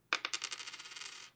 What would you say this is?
Singular Coin Dropping
A coin being dropped on a wooden desk.